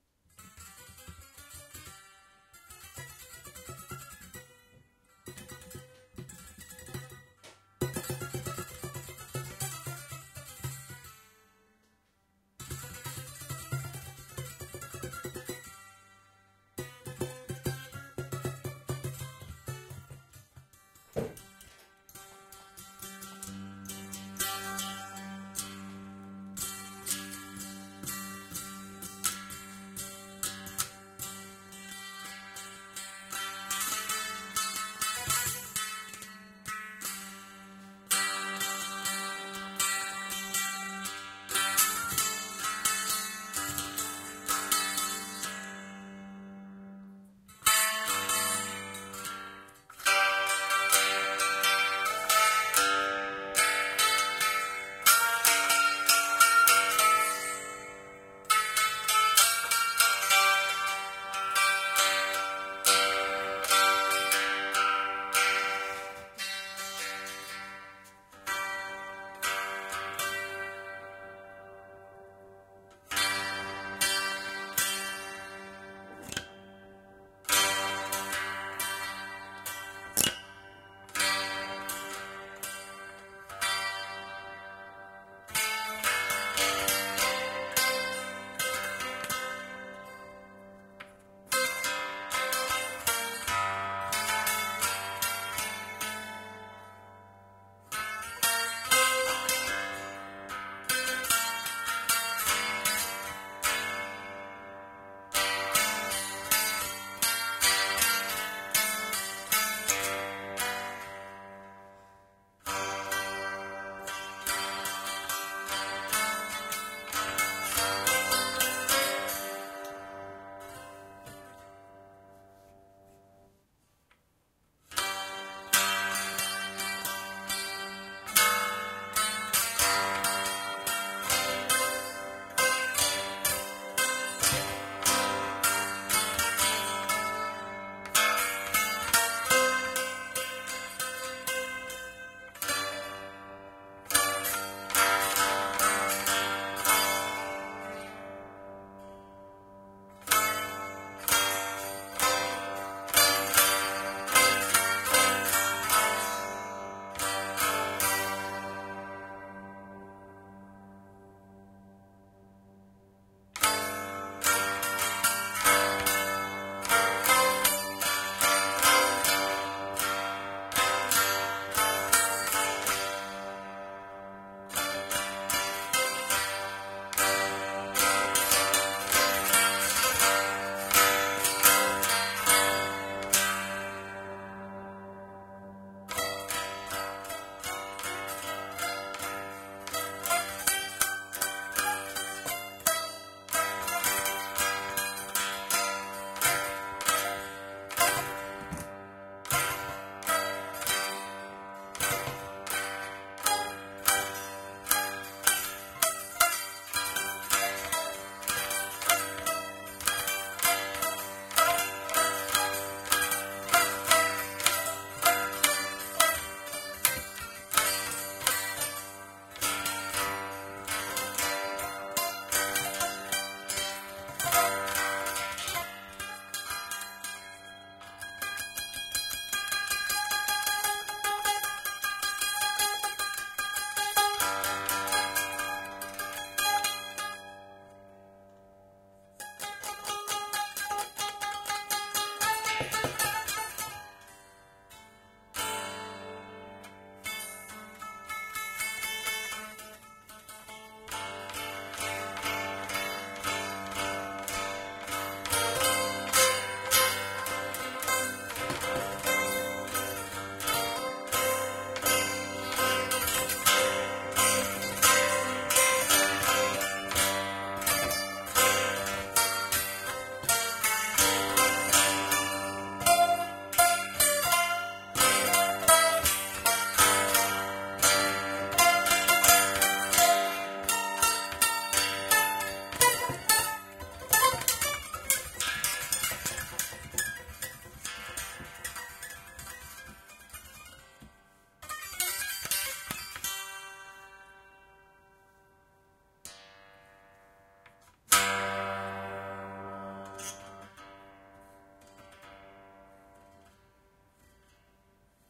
Taishōgoto? Taisho koto? Nardan harp? Keyboard-koto? Typewriter-koto? Nagoya Harp? Banjo-keyboard? Bul-bul-tarang? Indian banjo? Japan Banjo? Benju? Akkordolia? Kottafoshi? Medolin?
The father of a Japanese friend of mine bought this instrument for cheap in a local thrift shop. I didn't know of its existence. I believe it's supposed to be a keyboard version of the strummed (plectrum) Japanese koto. For some reason it felt more indian than Japanese to me, or middle eastern, probably because of the drone-string.
Zoom H2n MS-stereo recording.